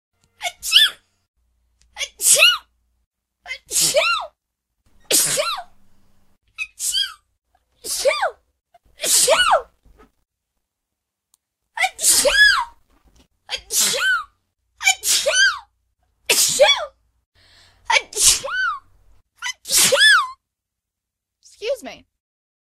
Sneezes anyone?
allergies, high, sick, pitch, god, sneeze, female, bless